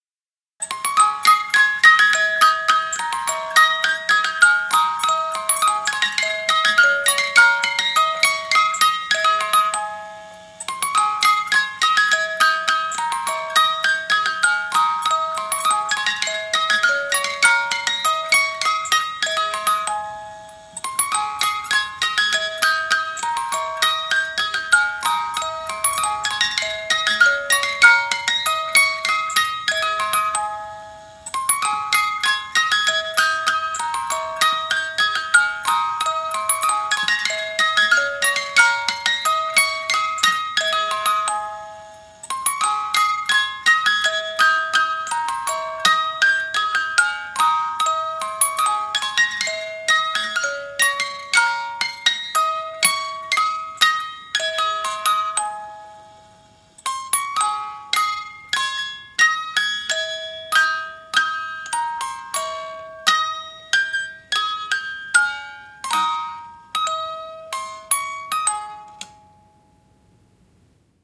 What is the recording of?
The sound of a 3 year old wind up toy, 7" high, a merry-go-round carousel with flying horses rotating while the music plays.